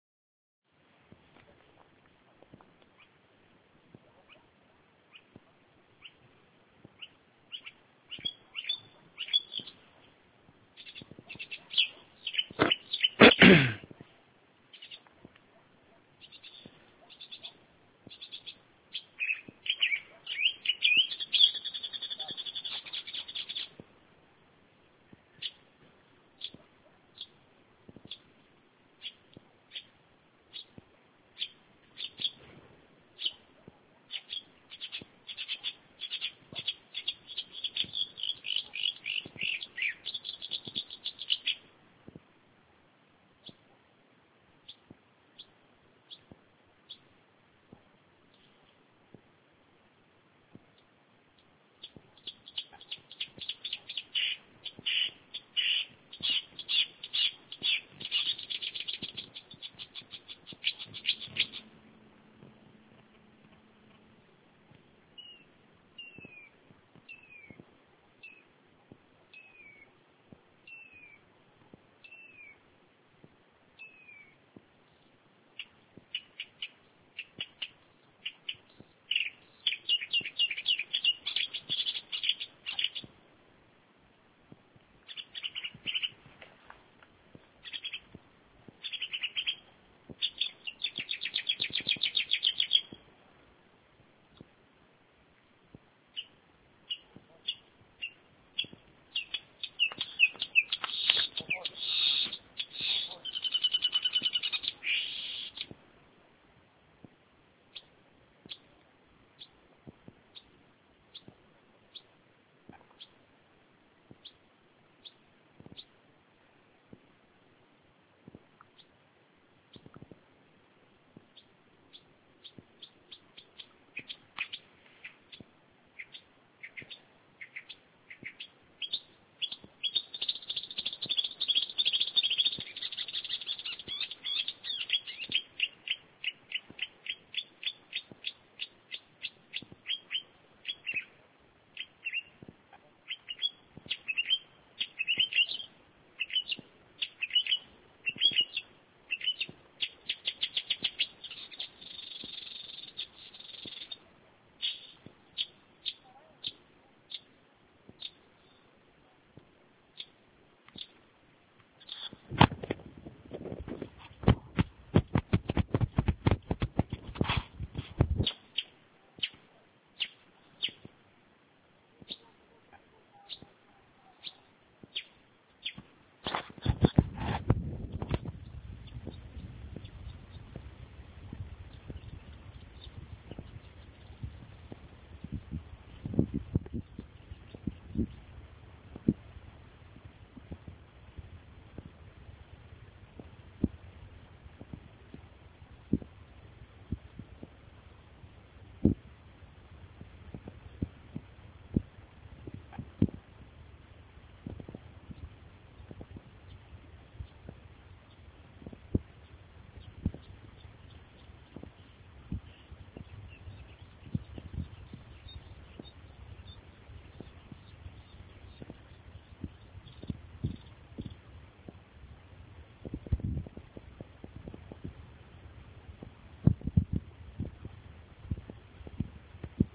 summer night bird2
I don't now what the bird is. But it's beautiful. Take by my phone in summer night.
bird, summer, night